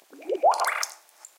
Water sound collection